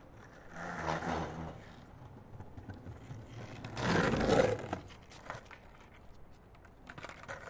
The sound of skate boards that i take for my video project "Scate Girls".
And I never use it. So may be it was made for you guys ))
Here Girls ride from hill one by one.